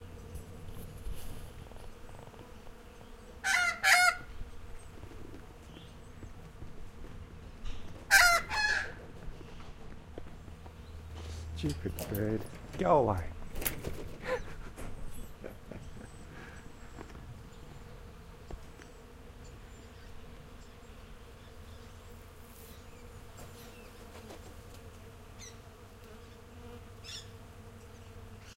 Bird and bees
Recording chain: AT3032 microphones - Sound Devices MixPre - Edirol R09HR
sound-recordist, australian-magpie, squak, out-take